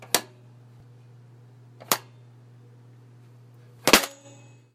Drawer Tabs
the tabs that hold paper currency in a cash register's drawer, being flicked down into empty slots.
foley, tabs